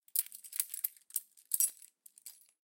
Keys Jingling 2 1
Design, Door, Foley, Jingle, Jingling, Key, Keys, Lock, Rattle, Real, Recording, Sound